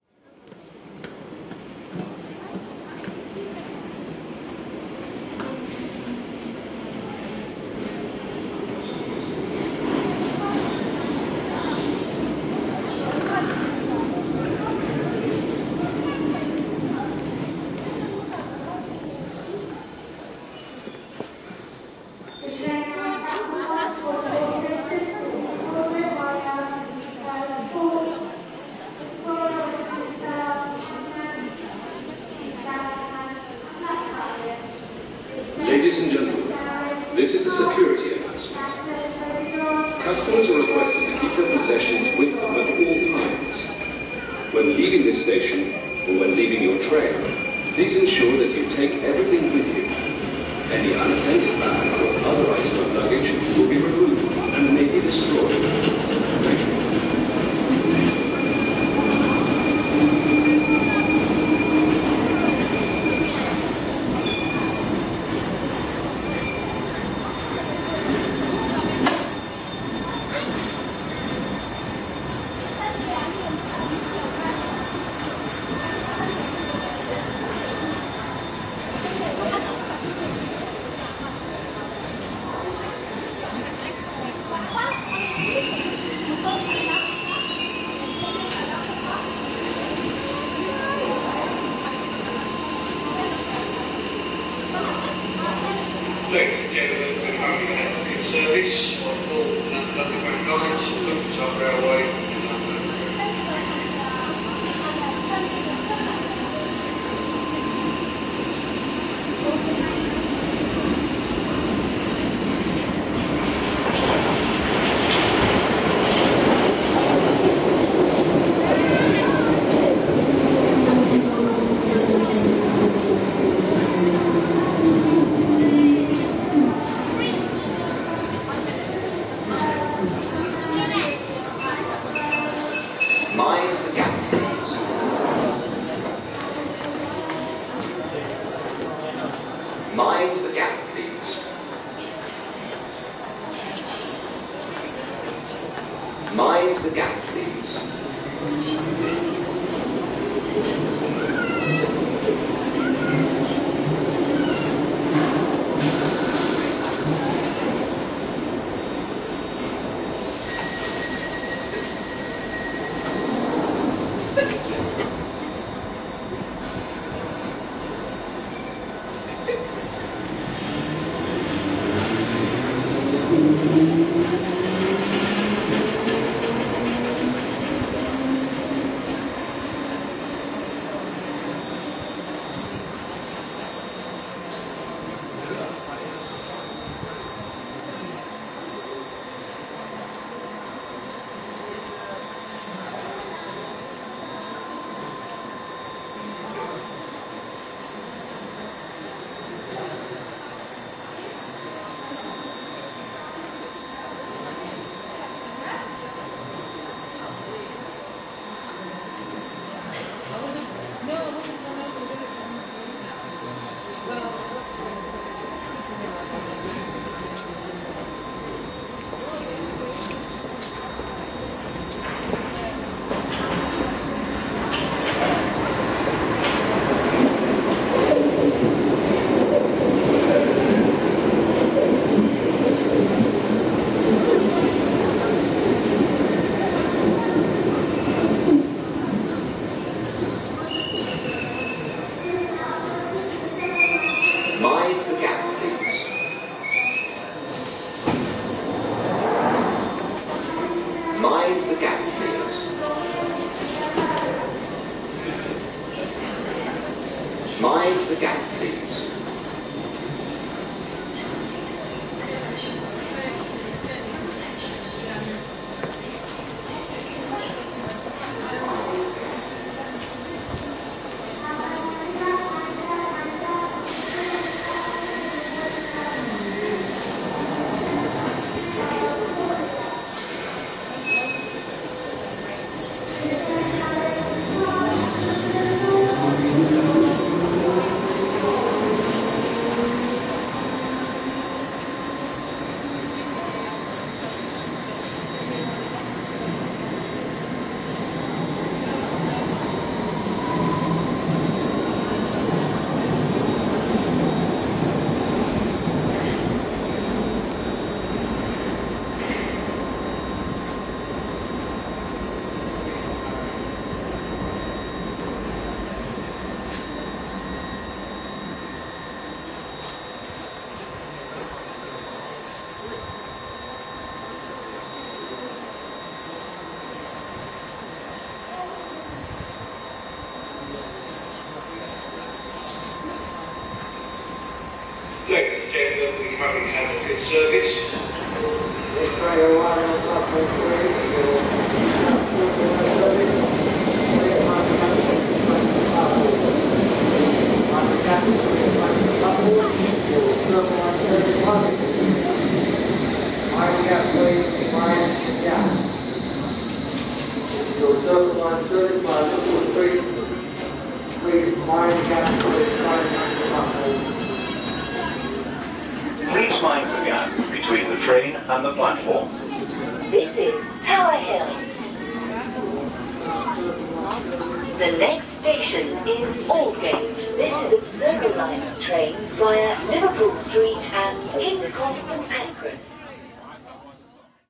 London Underground- Tower Hill tube station ambience
The general ambience of a London tube station: train arriving and departing, and plenty of people. Recorded 17th Feb 2015 at Tower Hill tube station with 4th-gen iPod touch. Edited with Audacity. Shortened version also available.
ambiance, ambience, announcement, arrive, circle, circle-line, depart, district, district-line, field-recording, london, london-underground, metro, mind-the-gap, people, station, subway, talk, tower-hill, train, tube, tube-station, tube-train, underground, voice